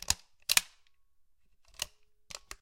rifle open+close breech(2)
A Lee- Enfield SMLE rife bolt being opened and closed whilst empty (without a round inserted)